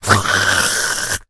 A low pitched guttural voice sound to be used in horror games, and of course zombie shooters. Useful for a making the army of the undead really scary.
Evil, Ghoul, Growl, Lich, Monster, Speak, Talk, Undead, Vocal, Voice, Voices, Zombie, arcade, game, gamedev, gamedeveloping, games, gaming, horror, indiedev, indiegamedev, sfx, videogame, videogames